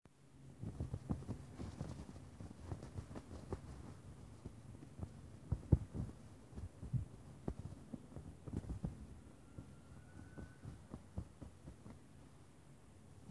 desamarrando saco(cortar) (1)
sonido, saco, desamarrando